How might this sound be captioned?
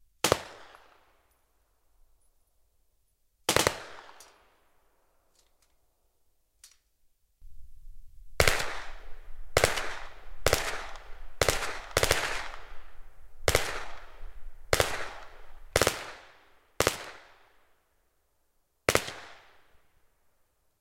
Stengun near 2
Near record of authentic stengun, view also Stengun near 1
wwii, sten, gun, Stengun